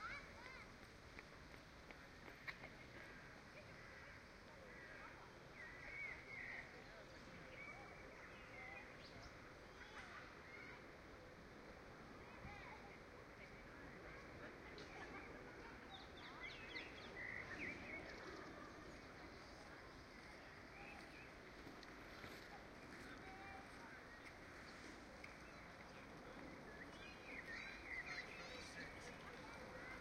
30-sec binaural field recording, recorded in late 2012 in London, using Soundman OKM II microphone.
This recording comes from the 'scene classification' public development dataset.
Research citation: Dimitrios Giannoulis, Emmanouil Benetos, Dan Stowell, Mathias Rossignol, Mathieu Lagrange and Mark D. Plumbley, 'Detection and Classification of Acoustic Scenes and Events: An IEEE AASP Challenge', In: Proceedings of the Workshop on Applications of Signal Processing to Audio and Acoustics (WASPAA), October 20-23, 2013, New Paltz, NY, USA. 4 Pages.
binaural, field-recording, ambiance, qmul, park, ambience, london, c4dm